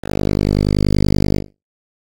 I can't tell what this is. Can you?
Music Note 1
Music Note 4
Tags:
music note sample atmosphere stab musicbox Ace Piano Ambiance stabs Loop 5 this Random samples Recording Recording sound effect Erokia Erokia electronic live three fruity pack dj electronica loops substep Wobbles Wobbles beautiful pretty Dub one shot one LFO effects effects wobble wobble noise dubstep sub
5, Ace, Ambiance, atmosphere, dj, effect, electronic, electronica, Erokia, fruity, live, Loop, music, musicbox, note, pack, Piano, Random, Recording, sample, samples, sound, stab, stabs, this, three